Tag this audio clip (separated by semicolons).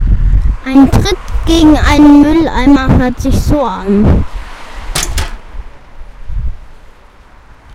clack; can; garbage-can